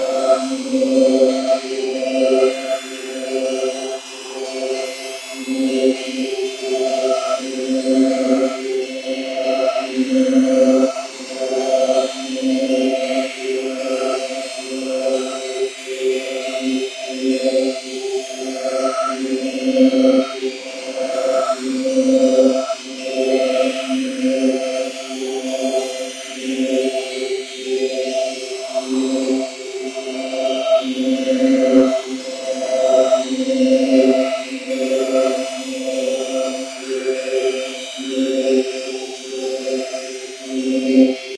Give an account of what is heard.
This piece was made with Buddha Orchestra in the KX Studio Environment.